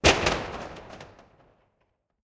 metallic crash
The sound of a collision with a metallic surface